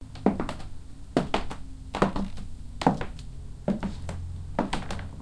G3 heels in wood floor
a woman with heels walks on a wooden floor
woman, wooden, walking, floor